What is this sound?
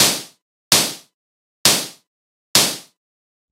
Sub 37 Noise Snare
Making synth noises with the Sub 37